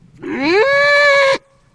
Monster Call 1
call, creature, fantasy, monster, monster-call